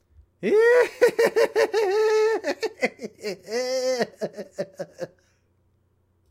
High- pitched male laugh
High, laugh, male, pitched